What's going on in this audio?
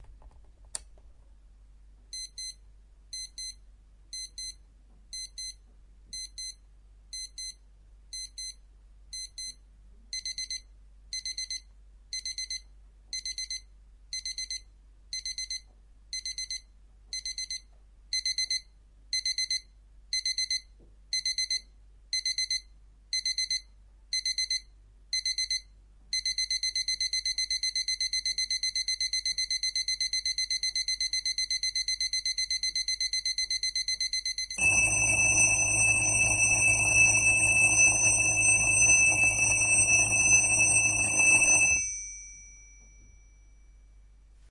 CasioLC-DM-3
Testing sound recorded by Olympus DM-3 with Low sensitive microphone